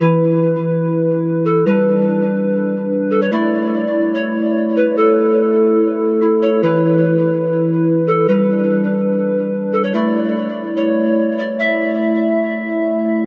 The Fall of Icarus
A Flutey/Pad/Melody.
beat, sequence, techno, progression, trance, melody, 145-bpm, strings, synth, pad, phase